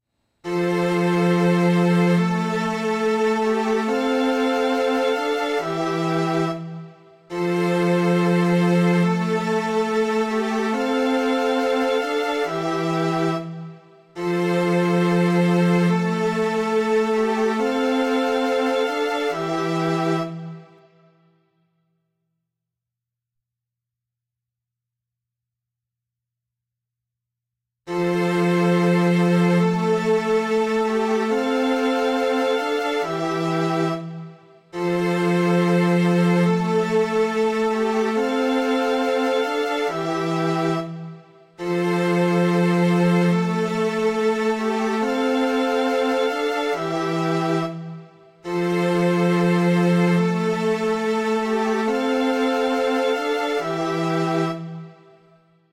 HH140 Strings
A String Pad from a recent track; at 140BPM.